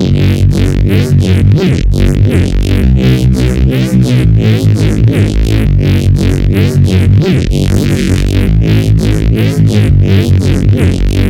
Just a little wobbly bass I came up with for Drum and Bass style of music at 170 BPM